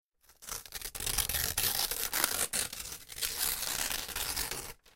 This is a sample from my sample pack "tearing a piece of paper".